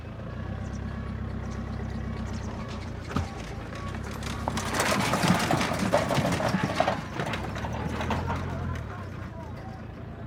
truck or cart pass heavy rattle metal wood stuff Gaza 2016
heavy, metal, cart